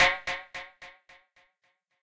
Laba Daba Dub (Congo)
Roots Rasta DuB
Roots, DuB, Rasta